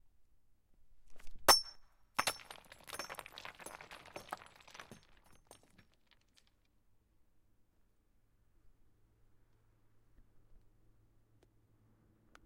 SFX Stone Calcit DeadSea Throw mittel #2-167
glassy stones being thrown
stone, rocks, throw, glass, falling, stones, rock